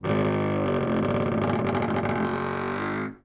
A squeaking door hinge. The door opens moderately slowly. Recorded with an AT2020 mic into a modified Marantz PMD661 and edited with Soundtrack Pro.
creak, door, hinge, spooky, wood